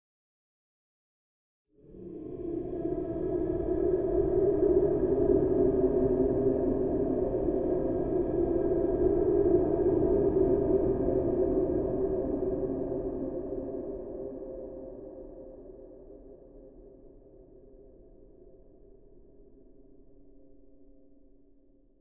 Drone created by convoluting an artillery gunshot with some weird impulse responses.